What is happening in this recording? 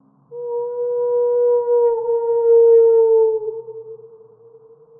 Wolf Crying Howl
animal, cry, Crying, Howl, howling, ululate, wolf